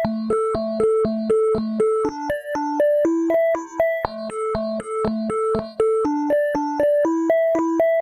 Nord Lead 2 - 2nd Dump